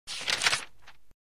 page turn sound effect
book turning sound effect